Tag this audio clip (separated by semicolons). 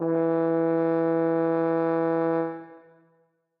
Brass; Horn; Sample